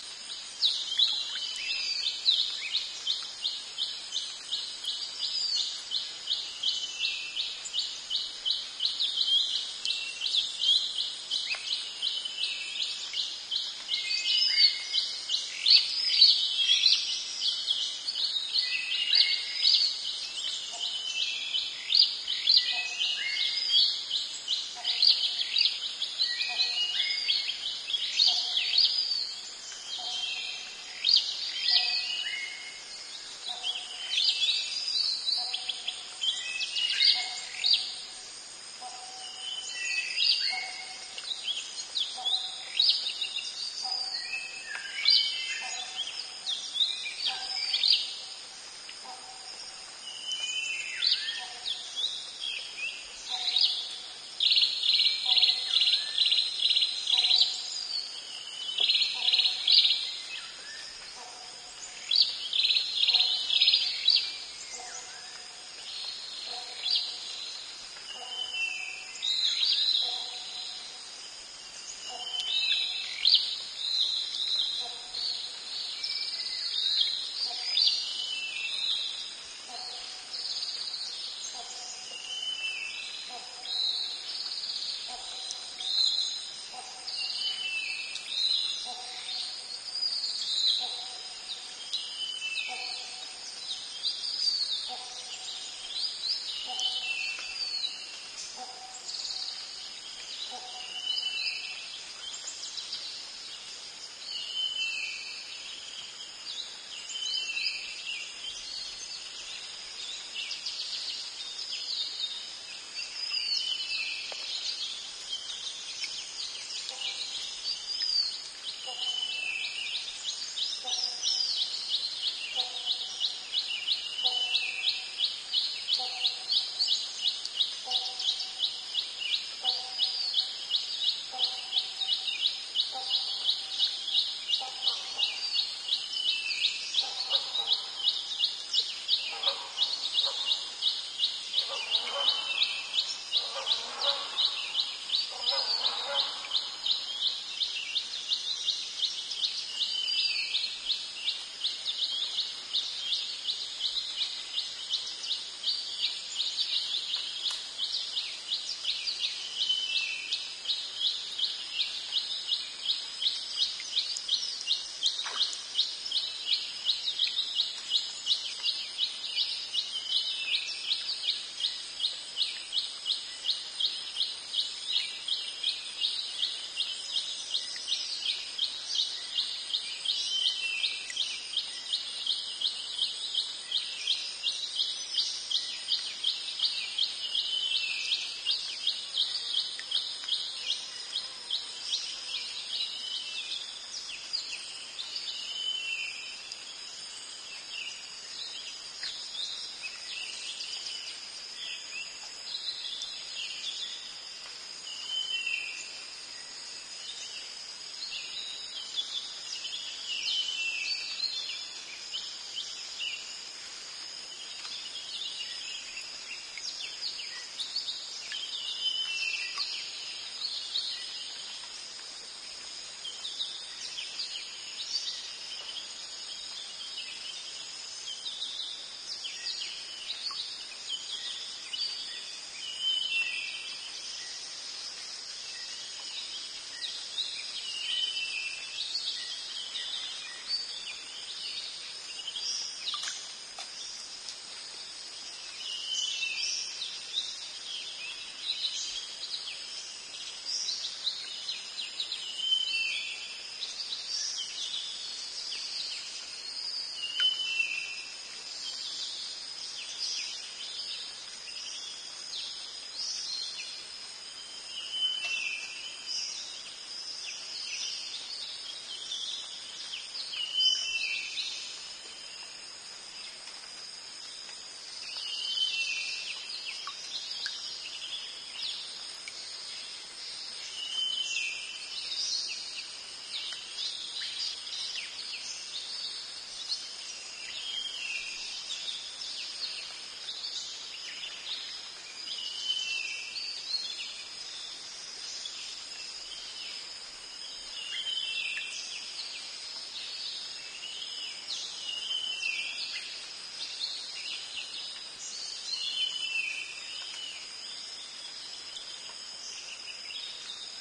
Thailand jungle morning crickets, birds echo +water drops on plants4 duelling hornbills partially cleaned
Thailand jungle morning crickets, birds echo +water drops on plants duelling hornbills partially cleaned
birds, crickets, drops, field-recording, jungle, morning, Thailand, water